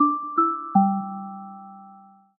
Achievement Accomplish Jingle App UI
button, lose, buttons, uix, beep, blip, clicks, menu, bleep, correct, event, win, sfx, startup, ui, game-menu, click, achievement, bloop, end, game, timer, mute, gui, application, puzzle, synth